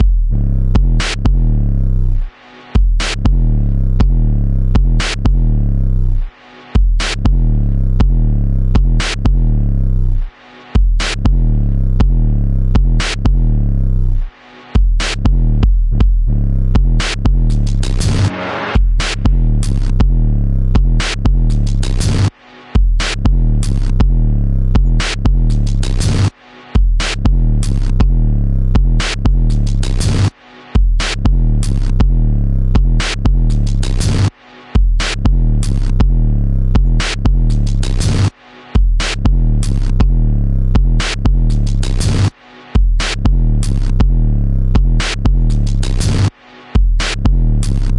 Botfed DRM-Loop #1
Gritty and distorted drumloop from one of my tracks. Have fun :)